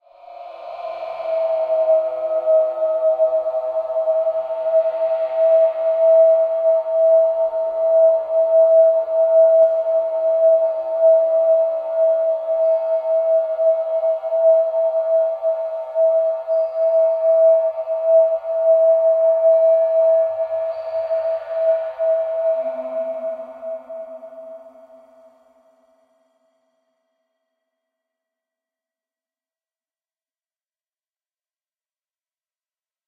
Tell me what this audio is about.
The (heavily processed) recording of a cicada at my garden. Recorded with a Tascam DR100.
cicada, insect, tropics